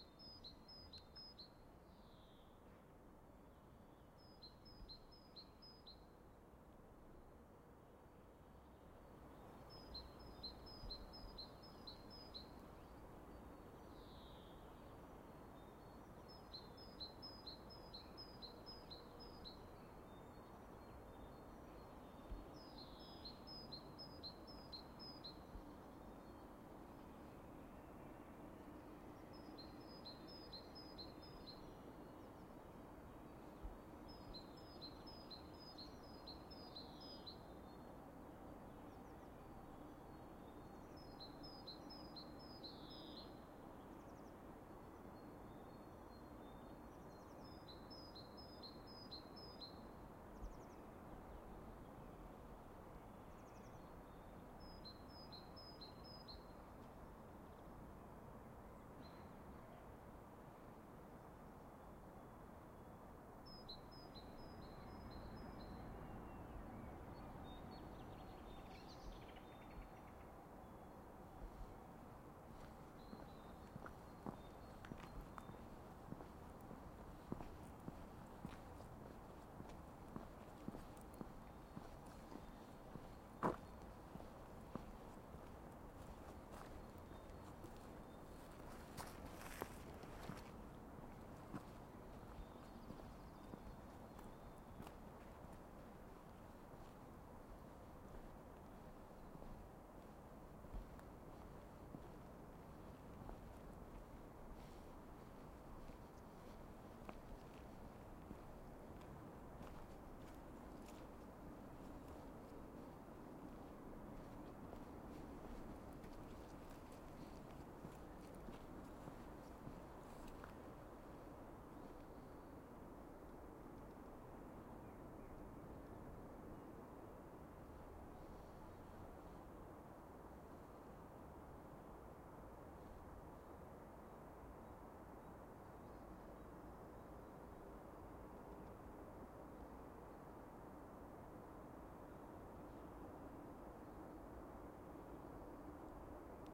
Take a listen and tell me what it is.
Birds singing in the spring. Distant traffic.